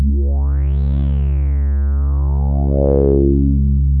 1 of 23 multisamples created with Subsynth. 2 full octaves of usable notes including sharps and flats. 1st note is C3 and last note is C5.